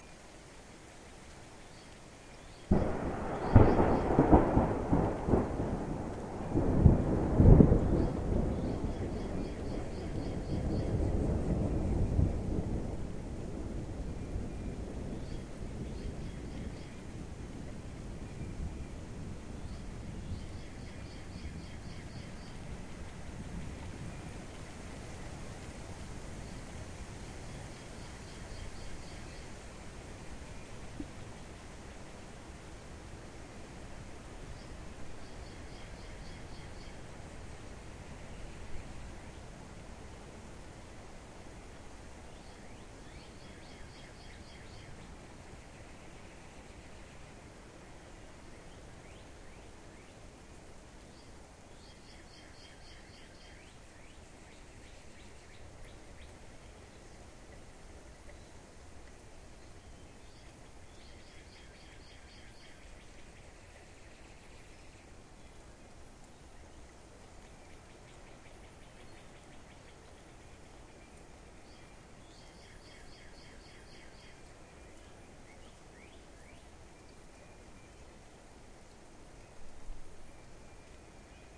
Bang thunder
Equipment: Tascam DR-03 on-board mics
An final early-morning thunder clap heralding the end of a night-long storm.
thunder, lightning, nature, boom, rumble, weather, crash, bass, field-recording, bang, storm